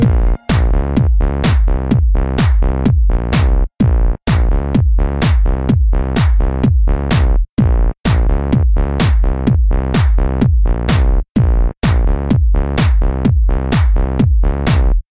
Loop Computer Feeling Good 03
A music loop to be used in fast paced games with tons of action for creating an adrenaline rush and somewhat adaptive musical experience.
videogame, games, game, battle, gamedev, gaming, music, indiegamedev, gamedeveloping, Video-Game, loop, music-loop, videogames, war, victory, indiedev